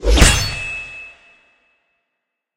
Sword Contact (with swipe)
Made in Fl Studio by layering a ton of sounds together. I mixed and mastered it to the best of my ability. Enjoy :D